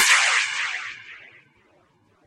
More impulse responses recorded with the DS-40 both direct to hard drive via USB and out in the field and converted and edited in Wavosaur and in Cool Edit 96 for old times sake. Subjects include outdoor racquetball court, glass vases, toy reverb microphone, soda cans, parking garage and a toybox all in various versions edited with and without noise reduction and delay effects, fun for the whole convoluted family. Recorded with a cheap party popper